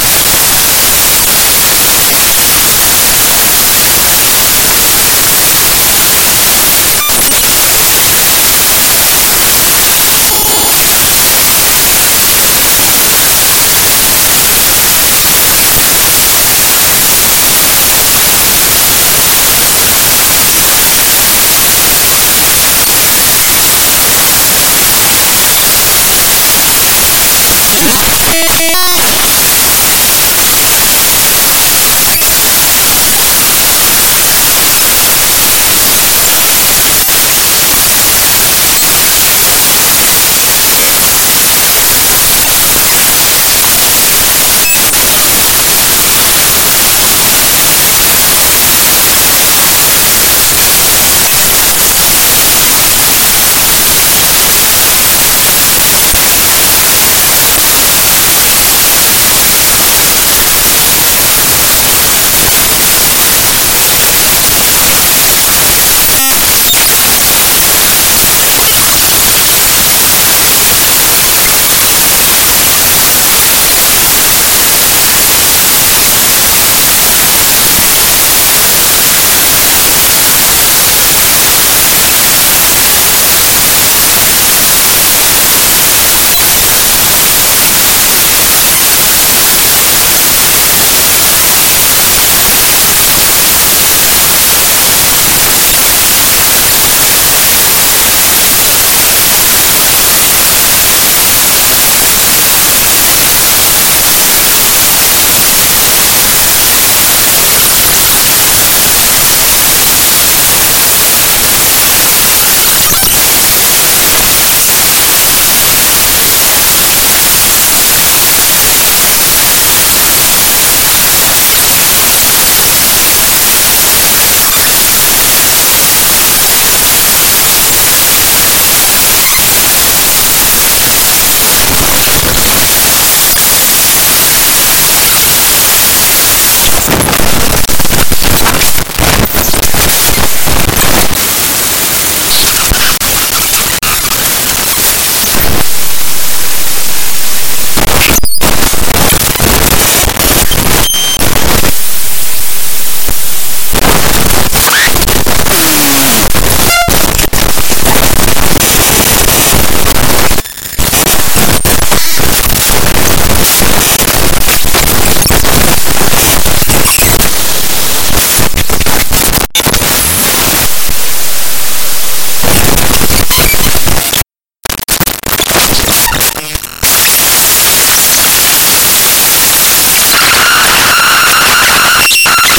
Raw Data 9
Various computer programs, images and dll/exe files opened as Raw Data in Audacity.
annoying, audacity, computer, data, electronic, glitch, noise, processed, raw, raw-data, sound-experiment, static